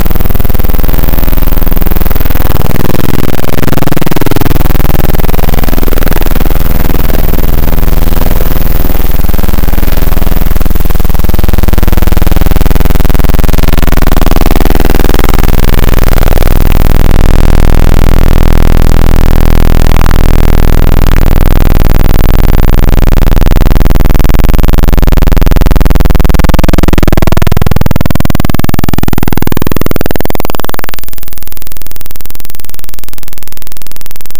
check your volume! Some of the sounds in this pack are loud and uncomfortable.
A collection of weird and sometimes frightening glitchy sounds and drones.
This was created by importing a bmp file into audacity as raw data.
background, data, distortion, drone, electricity, feedback, glitch, inteference, machine, noise, power, raw, static